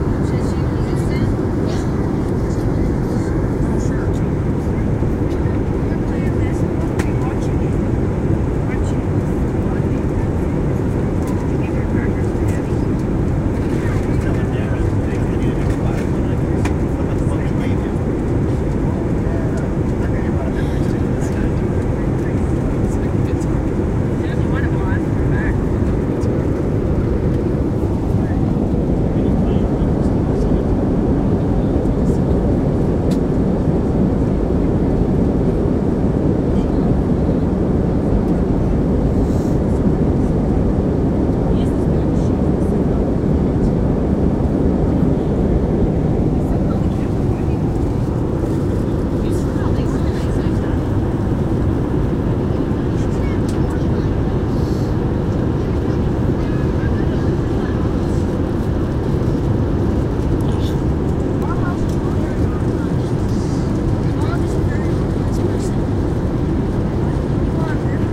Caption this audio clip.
Airplane Interior
ambience from the inside of a large airline plane
field-recording, voices, noise, chatter, airplane, interior